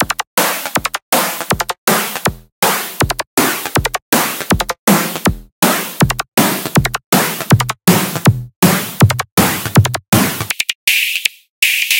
20140914 attackloop 160BPM 4 4 loop3.5
This is a loop created with the Waldorf Attack VST Drum Synth and it is a part of the 20140914_attackloop_160BPM_4/4_loop_pack. The loop was created using Cubase 7.5. Each loop is a different variation with various effects applied: Step filters, Guitar Rig 5, AmpSimulator and PSP 6.8 MultiDelay. Mastering was dons using iZotome Ozone 5. Everything is at 160 bpm and measure 4/4. Enjoy!
electronic, 160BPM, rhythmic, hard, electro, loop